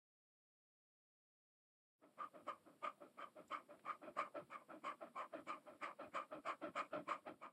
5 Beagle Panting
Beagle panting after running.
Recorded with a zoom h6
Beagle, CZ, Breath, Pet, Dog, Dog-Beagle, Panting, Beagle-Pantning, Panska, Dog-Pantning, Czech